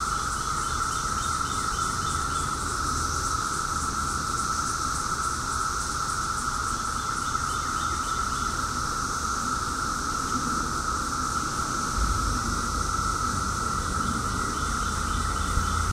This is a short clip of the big brood of cicadas in Northern Virginia on 5/27/2021.
cicadas, insects, nature, outdoors